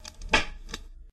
Radio band switch 03 mic
recorded sound of the band changing switch, the same old radio. ITT.
AM, ambient, effect, radio, radio-noise, switch